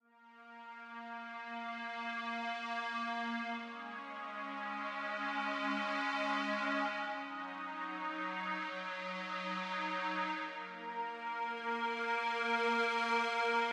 Pads part 2
8-bit, awesome, chords, hit, loops, melody, music, samples, sounds, synth, synthesizer, video